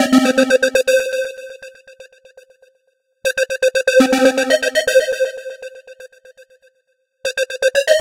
A sequence of beeps from VST instrument P8 at 120 BPM with some effects applied. Created for the continuum 4 project which can be found here:
blip, 120-bpm, pulse, dance, sequence, house, deep, continuum4, bars, sub, bpm, blips, hop, club, break, bass, breatbeak, 120, continuum-4, trance, beeps, tones, sine, 4, beep, 120bpm, hip